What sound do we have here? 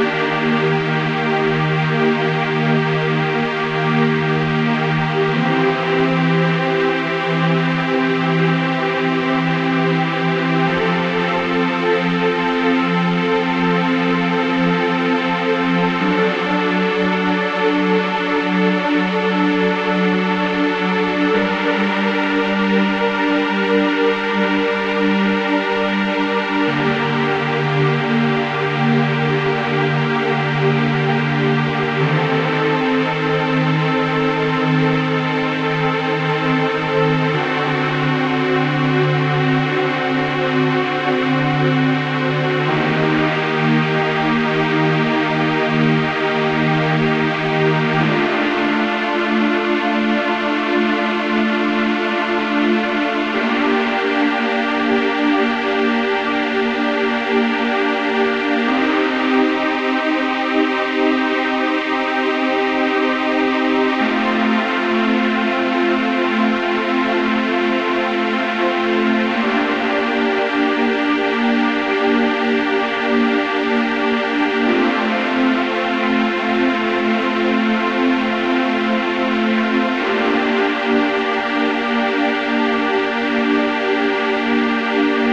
A simple electronic pad loop in g-minor